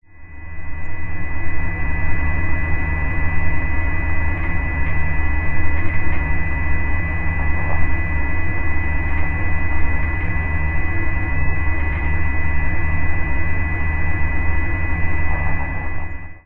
Noisy interior ambience of spacecraft. Made on an Alesis Micron.
spaceship, synthesizer, alesis, space, interior-ambience, spacecraft, micron